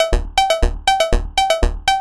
Made with Reason 9.5
EDS06s - the sound.
Matrix - processing.
Gate triggering, Volume and Pitch Coarse parameters processed through Matrix patterns.